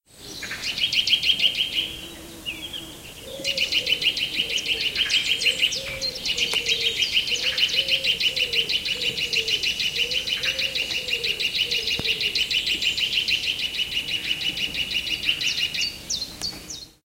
Nuthatch tells the whole forest who's boss. Nuthatch Singing A most beautiful song